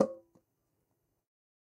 Metal Timbale closed 017
closed, conga, god, home, real, record, trash